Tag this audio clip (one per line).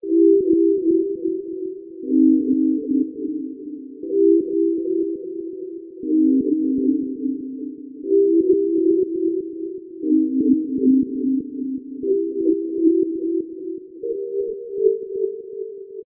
ALARM
RING
SOUND